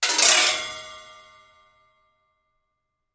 mar.gliss.resbars.updn4
Sample of marimba resonance pipes stroked by various mallets and sticks.